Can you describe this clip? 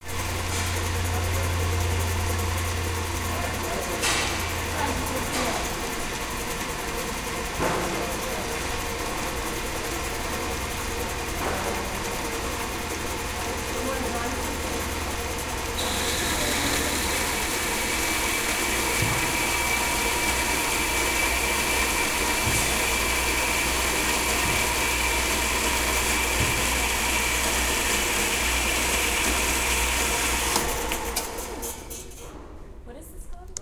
An electric saw in operation cutting a piece of metal at the Box Shop art studio in San Francisco.
aip09, electric-saw, san-francisco, stanford-university